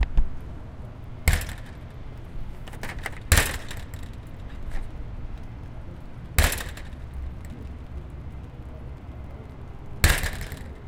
wire fence shut plastic metal gate